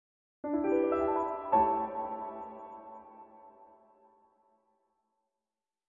A small and fast phrase expressing content.